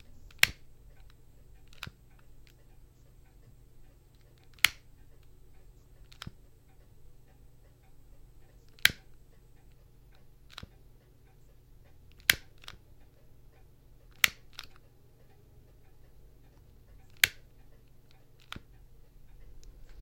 Turning on an electric lighter. Mono
fire, bic, sound-effect, electric-lighter, lighter